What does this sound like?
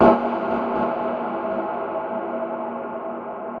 Industrial Strings Loop 005
Some industrial and metallic string-inspired sounds made with Tension from Live.
metallic, strings, industrial, dark-ambient